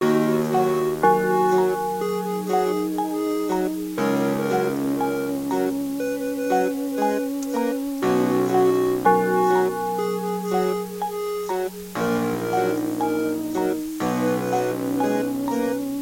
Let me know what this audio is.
CRYING FACES Melody
A collection of samples/loops intended for personal and commercial music production. All compositions where written and performed by Chris S. Bacon on Home Sick Recordings. Take things, shake things, make things.
loop, drums, vocal-loops, Folk, acapella, free, samples, rock, percussion, whistle, melody, acoustic-guitar, voice, indie, harmony, piano, synth, beat, bass, original-music, guitar, looping, loops, drum-beat, sounds, Indie-folk